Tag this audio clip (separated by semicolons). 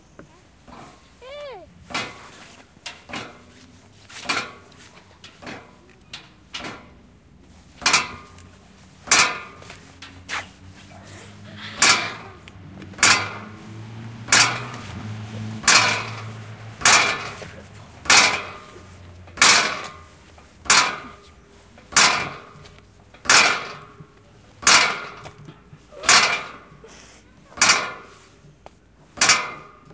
field-recording; Paris; snaps; sonic; TCR